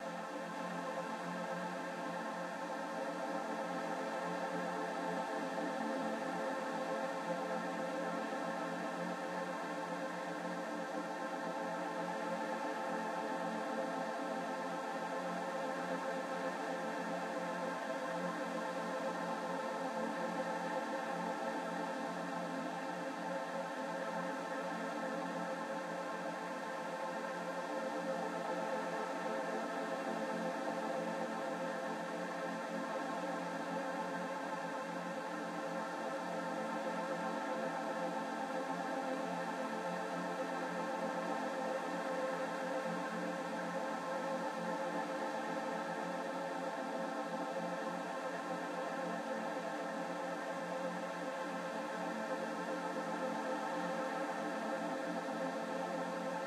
Fmaj-calm
Pad, created for my album "Life in the Troposphere".
ambient, pad